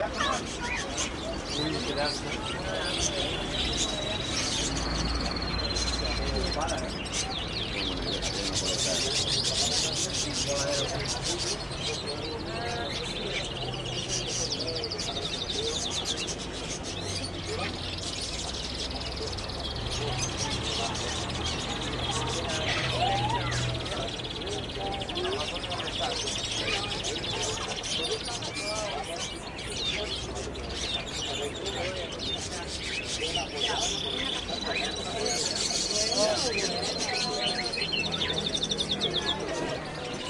Recorded next to a bird shop on "las ramblas" in barcelona. You can hear a multitude of different birds whistling all at the same time. In the background tourists and the shopkeeper.
barcelona, birds, bird-shop, field-recording, ramblas, tourists, traffic, whistling